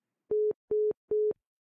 getting hung up on three beeps
That "boop boop boop" after someone hangs up on you/a call ends
Recorded like this, it was kinda ridiculous but very fun:
iPhone -> 1/8" to RCA cable -> used one of the RCA outputs -> RCA to 1/4" adapter -> Scarlett 2i2 -> ProTools
getting, hung, ending, beep, beeps